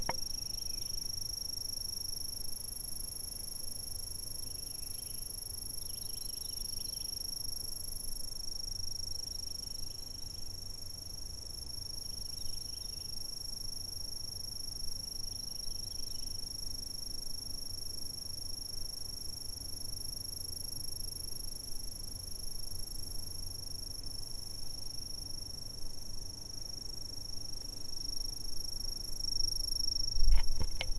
Insect in a tree
A ambient chirping insect noise I captured with my Zoom h4n in the woods of Georgia (Blanket's Creek park). I'm not sure of the type of bug, maybe an expert can fill me in! Nice sound to include in a layer of forest noises.
insect, woods, summer, tree, canton, georgia